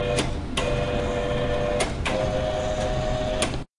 Mid frequencies sound obtained by recording the projector screen going up and down.
classroom, campus-upf